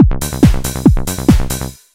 This is a basic Psytrance drum loop with bass. Use how you will, in mixing, in DJing and whatever.
studio, psy, bpm